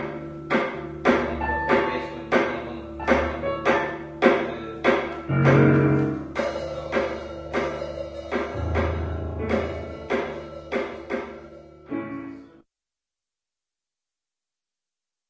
The gaps between playing - voices and random sounds at writing sessions, May 2006. Recorded using Sony MZ0-R90 Portable Minidisc Recorder and Sony ECM-MS907 stereo mic.